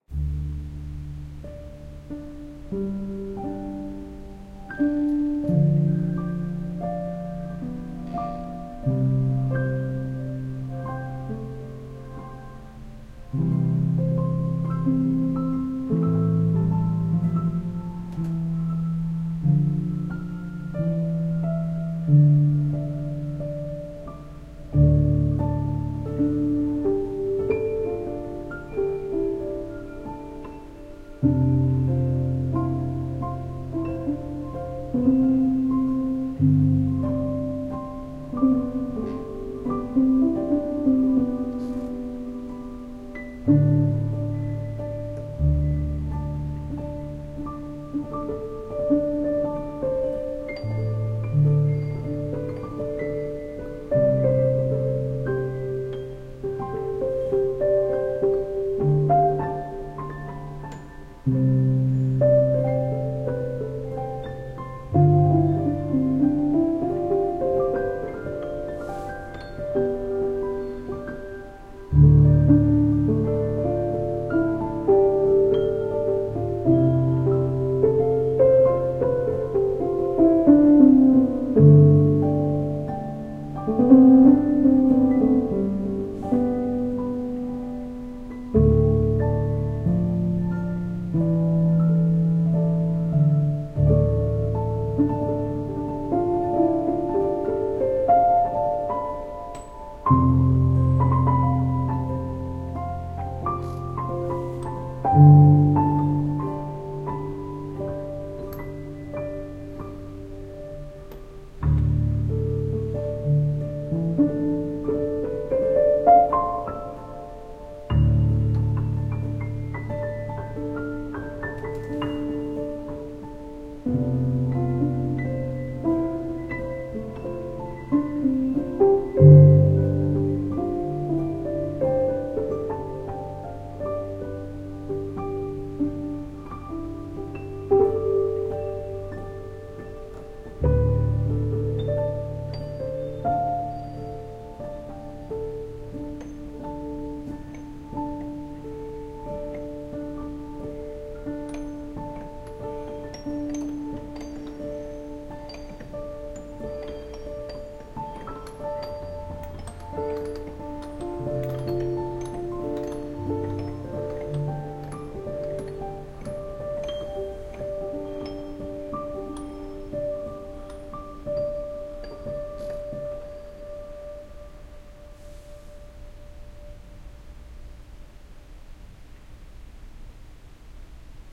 Melodic piano with gentle ambience.